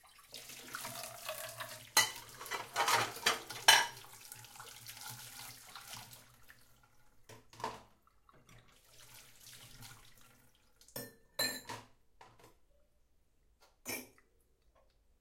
Dish washing in a reverberant kitchen.
The recorder, a Tascam DR100 mkII, was placed at half meter away from the sink.
dishes, kitchen